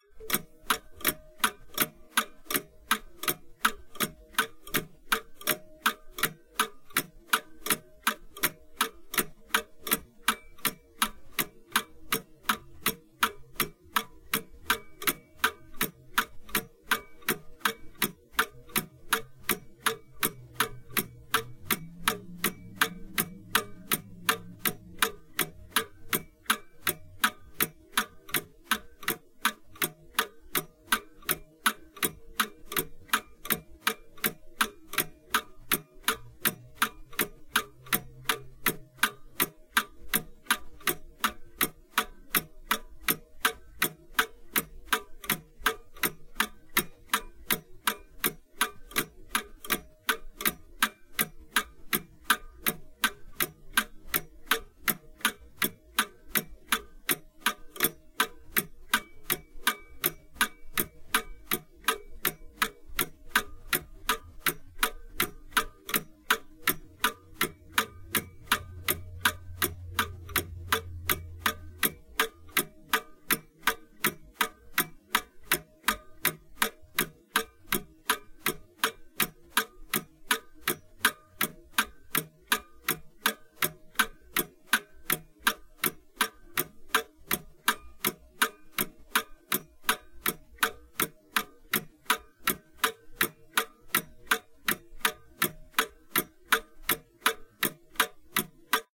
Old Clock Pendulum
This is the sound of an old clock and it's pendulum swinging back and forth. Recorded with a Sound Devices MixPre 3ll in stereo with a pair of Audio Technica AT943 microphones.